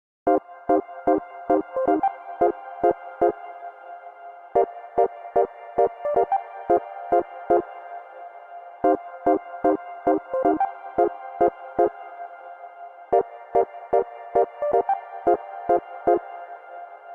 112bpm Catchy Reverb Synth Loop
A little wet synth riff I found in my loops folder. Has a bit of swing to it, loops. Made in Logic. Chords/melody part.
ambient, bounce, chord, chords, club, dance, delay, drop, echo, edm, effect, electro, electronic, fx, house, keyboard, keys, loop, melodic, melody, minimal, progression, reverb, reverby, synth, techno, trance